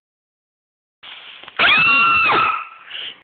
A woman scream that I made for a school project